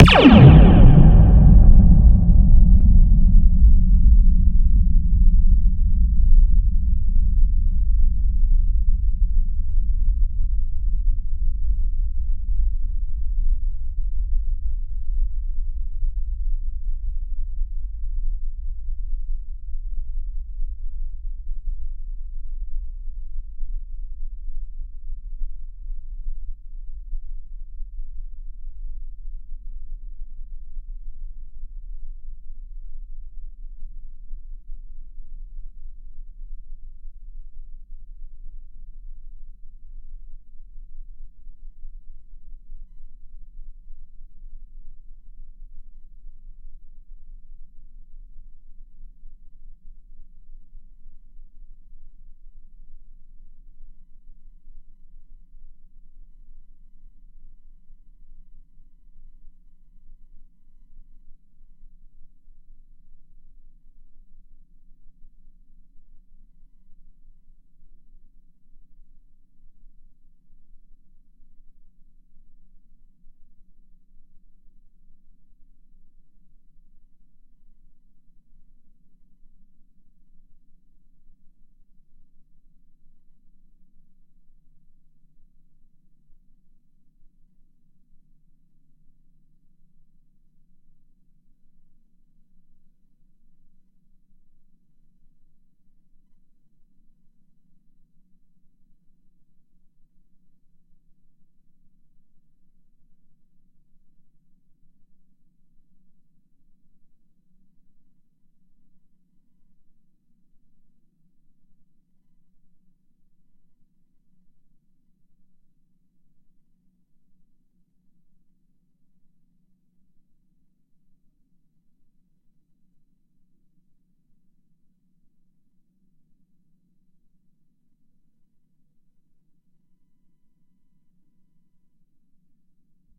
A slinky spring toy stretched out and plucked once from the end, recorded with a Zeppelin Design Labs Cortado impedance-matched piezo contact microphone. The resulting recording is a classic spring-based sci-fi "laser sound" with a massive, lengthy, rumbling decay tail.
In addition to its use as a sound effect, this waveform works well inside a convolution reverb as an offbeat spring reverb impulse response, provided one has the processor power and RAM to support a 2 minute 13 second convolution. The Cortado's bass response is exceptionally large for a contact mic, so high pass filtering may be desired if used as an impulse response.